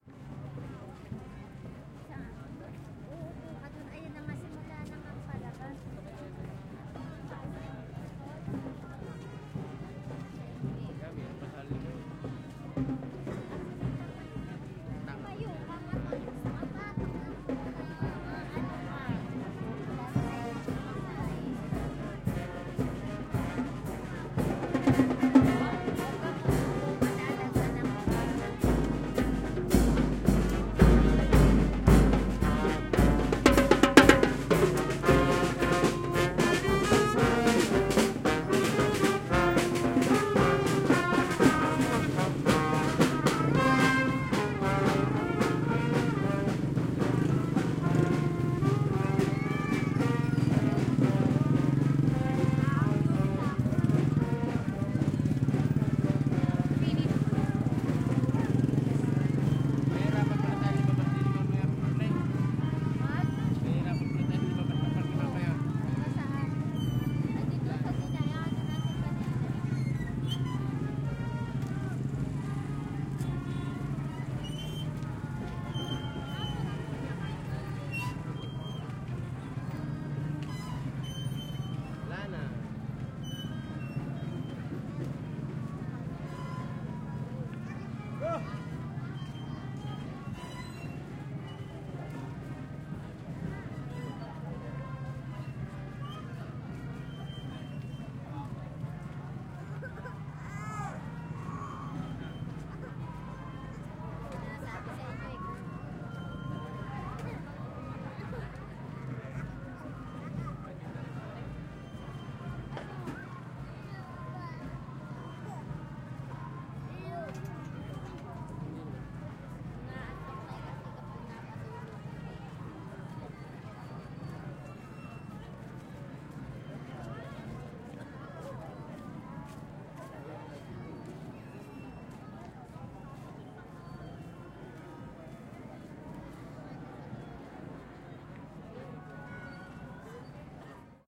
LS 33507 PH Parade
Parade (Calapan city, Philippines).
I recorded this audio file in the evening of January 1st of 2017, in Calapan city (Oriental Mindoro, Philippines). In the street, a parade held in honour of Santo Nino (Jesus Crist) was passing by. You can hear the band playing music while walking ahead of a statue of Santo Nino perched on a truck, and the ambience in the surrounding (people talking, vehicles, etc…)
Recorded with an Olympus LS-3 (internal microphones, TRESMIC ON).
Fade in/out and high pass filter 160Hz -6dB/oct applied in Audacity.